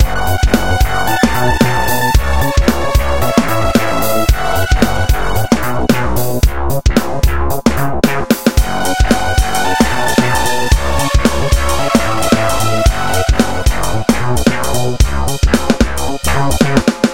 Nohe Fero
112bpm, 90s, figure, funk, Gb, minor, rock, uptempo